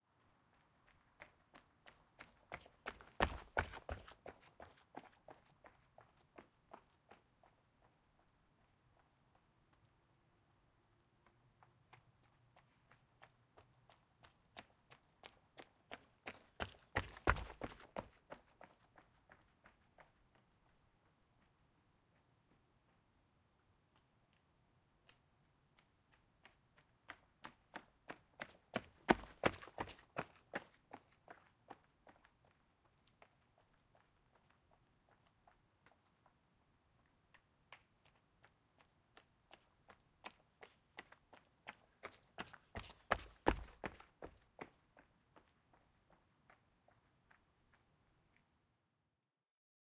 Jogging Past Footsteps Back and Forth in Tennis Shoes on Sidewalk 2
Recorded with my H1n on a quiet street, exactly what the title describes. On this one the recorder is facing front so it sounds more like the jogger is coming AT you.
Tennis-shoes, Footseps, Foley, Jogging, Running, Sidewalk, Street